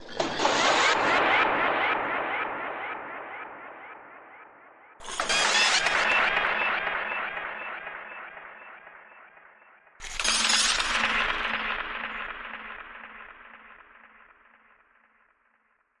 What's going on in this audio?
Mechanical Glitch Effect - 05
A mechanical glitch sound effect.